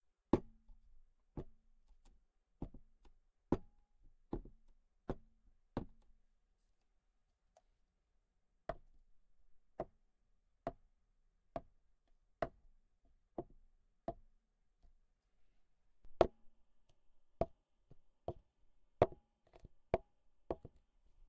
putting to go coffee cup down
A light cup being set down.
coffee, cup, set-down